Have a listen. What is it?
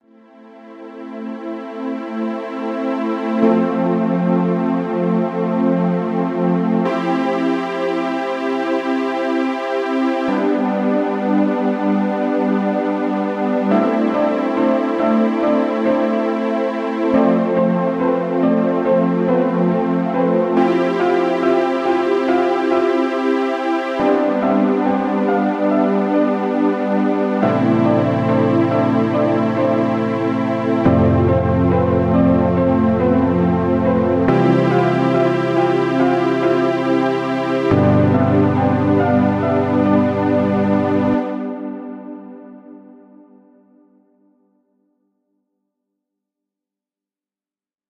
Deep flow
deep, pattern, Random, sample, sound